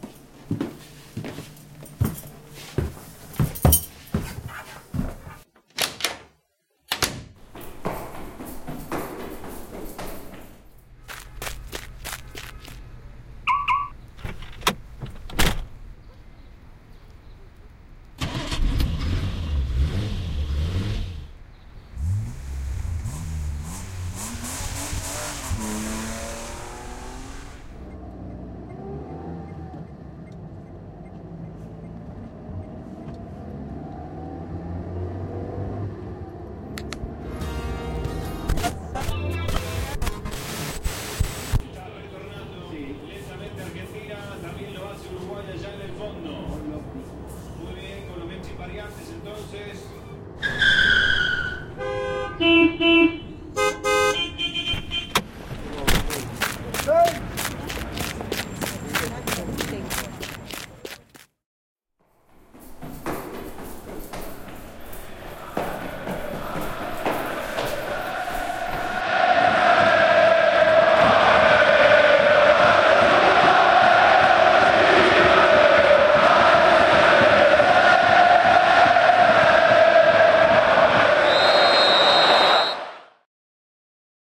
The Soccer Fans
60s, drama, radio, sounds